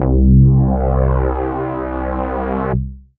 174 Sec.Lab Neuro Bubles2cryo
Neuro Bass by Sec.Lab
synth, dark, Neuro, Neurofunk, Jungle, Bass, dnb